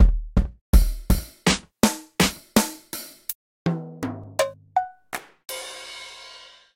Po-33 Drum kit Natural Drums
(The Po-33 splits one sound file into a kit of 16 sounds. Hence why a sample pack like this is appreciated)
This one on the themes of an acoustic drum kit
By SoneProject :
Processing was done to set the sound in Mono, Hats overlayed on kicks/snares to save on the sampler's polyphony, Kick overlayed, trimmed a bit, normalized.
Hope you enjoy :)
drum, kit, Operator, percussion, po33, Po-33, Pocket, samplepack, Teenage-engineering